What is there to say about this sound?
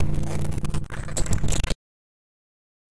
Viral Noisse FX 04